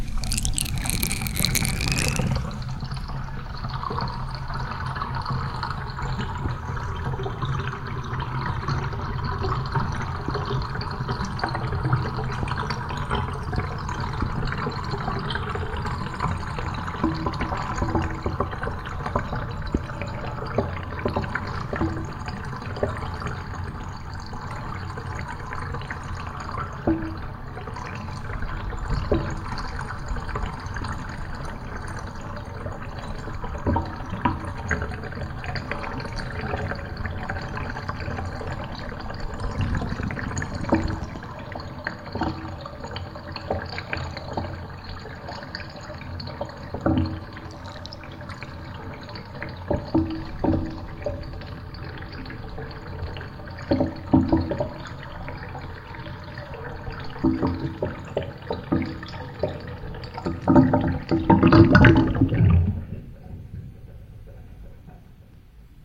Water draining out of a bathtub with the mic close to the drain. Lots of gurgling sounds and a nice bass sound at the end when the tub empties.